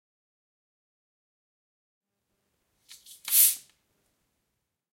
open sparkling water
Noise from pub/club/bar
club, cz, czech